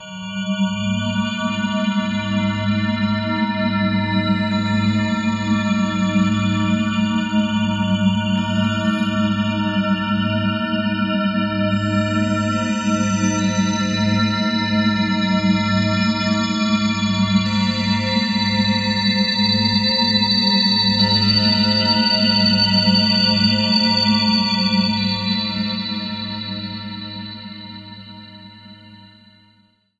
THE REAL VIRUS 06 - BELL DRONE - G#3
Drone bell sound. Ambient landscape. All done on my Virus TI. Sequencing done within Cubase 5, audio editing within Wavelab 6.